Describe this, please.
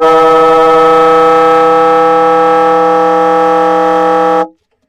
Tenor Sax Multiphonic ab4
The format is ready to use in sampletank but obviously can be imported to other samplers. The collection includes multiple articulations for a realistic performance.
saxophone, woodwind, sampled-instruments, sax, vst, jazz, tenor-sax